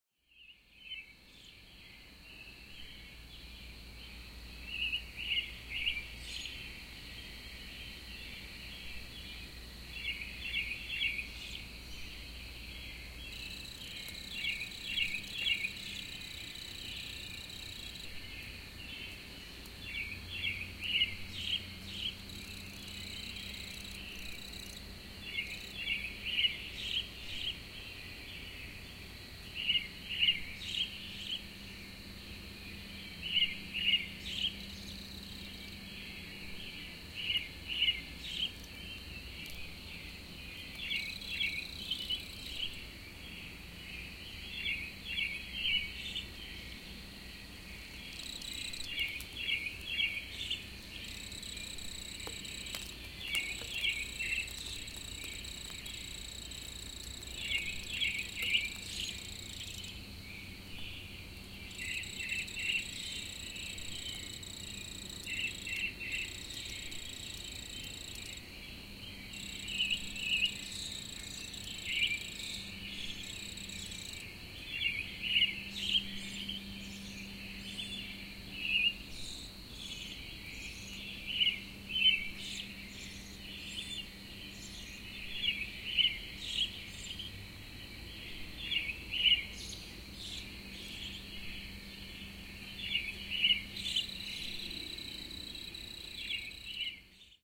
A recording made around 5 AM in early April....the peaceful but yet exhilirating ambience of Spring birds and crickets. There is an ocassional cricket who sounds like he literally must have been sitting point-blank in front of my microphone - a great surprise, you can literally hear his wings stridulating. Recorded using the Handy Zoom H4N and the built-in stereo mics.
ambience, birds, crickets, early-morning, field-recording, forest, katydid, nature, nature-scape, peaceful, pleasant, serene, sound-scape, spring, woods